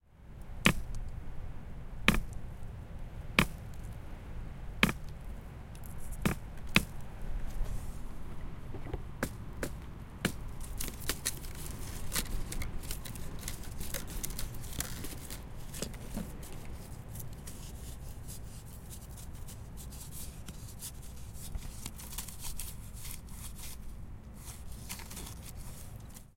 This Foley sample was recorded with a Zoom H4n, edited in Ableton Live 9 and Mastered in Studio One.
field-recording, microphone, sound, nature, mic, Foley, design, movement, rustle